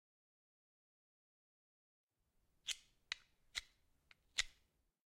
ignition by two stones
Ignition with two stones. Wiping the two stones together creates a spark.
CZ, Czech, fire, ignited, Panska, stones